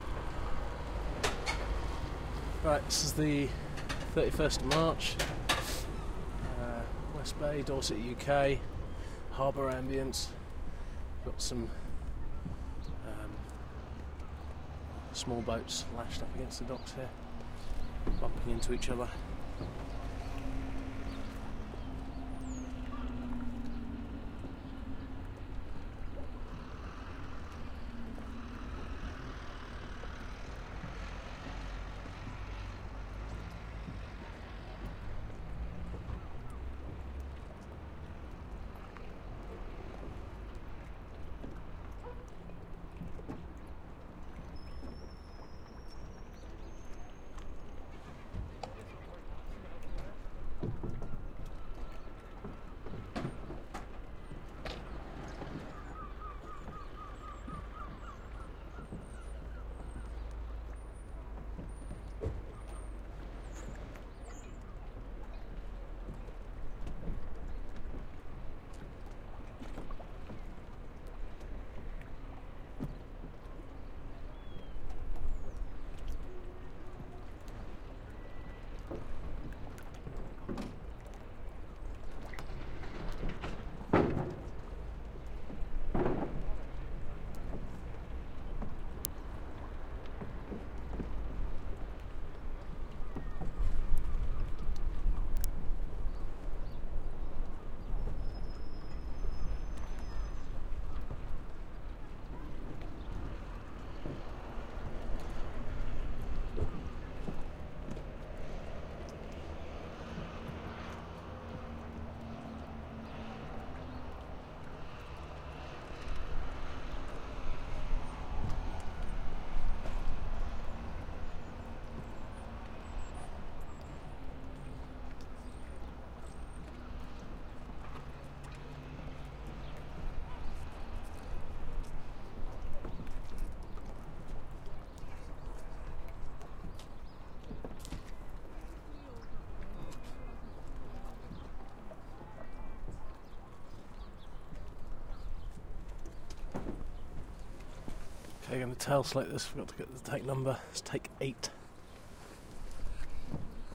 CFX-20130331-UK-DorsetHarbour08
Small Harbour Ambience
Ambience, Harbour, Small